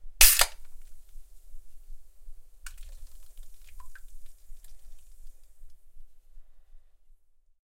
Opening a can of fizzy drink (a can of beer). Shorter than #1 in pack. Recorded on an H5